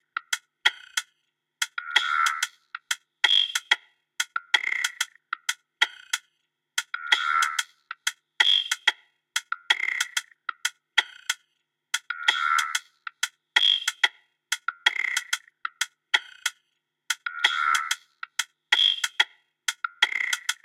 pin glitch3
lo-fi, noise